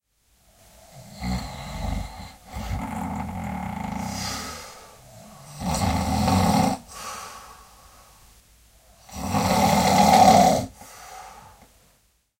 snoring sounds 2
a man who snores so the whole house shakes
bedroom, disturbance, human, man, men-snoring, noise, sleep, sleeping, snore, snoring-sounds